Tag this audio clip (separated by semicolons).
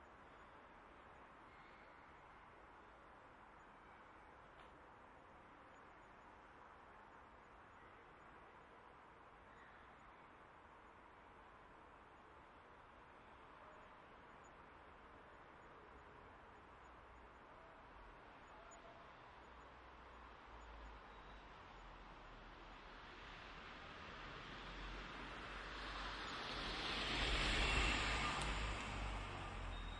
ambience; binaural